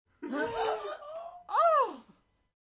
group shocked8
shock-reaction of a group
shock, shocked, tension